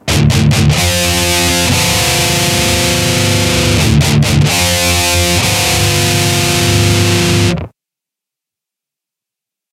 Metal Guitar Loops All but number 4 need to be trimmed in this pack. they are all 130 BPM 440 A with the low E dropped to D